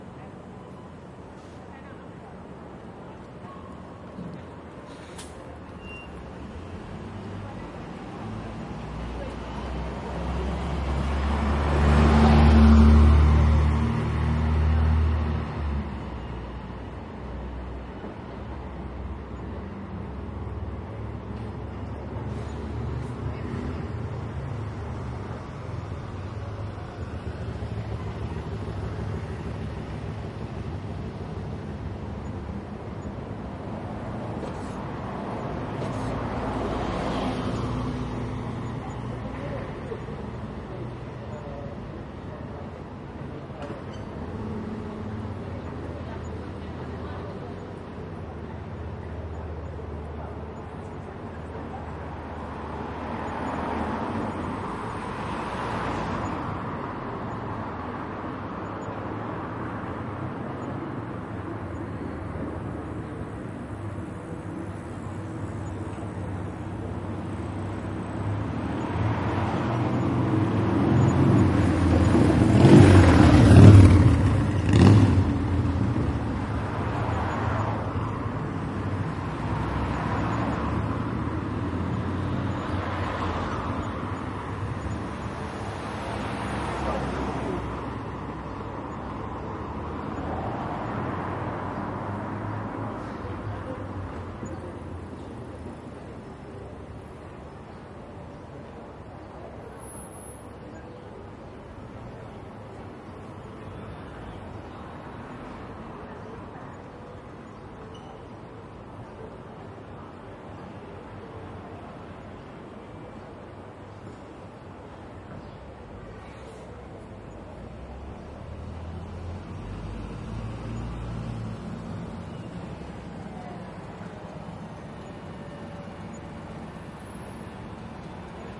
170717 Stockholm BirgerJarlsgatan R
A bus stop on the Birger Jarlsgatan in Stockholm/Sweden. It is a sunny afternoon and there is a fair amount of pedestrian and automobile traffic underway. A few pedestrians are waiting at the bus stop adjacent to the park, automobile traffic features buses arriving, stopping and driving off, and, for some reason, a large amount of expensive sports cars. The recorder is situated at street level at the end of a traffic island surrounded by the two car lanes of the street, so it favors details of the passing cars and buses, with a very wide stereo image.
Recorded with a Zoom H2N. These are the REAR channels of a 4ch surround recording. Mics set to 120° dispersion.
street-level, stop, ambience, surround, bus, Sweden, field-recording, traffic, cars, street, people, city, Europe, urban, Stockholm